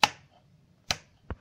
simple clicks
click, Clicks, short